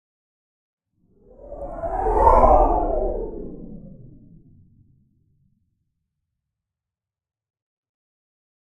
A spaceship flyby. A little doppler shift in there. A little reminiscent of a tie fighter. A bit of low end rumble on the pass.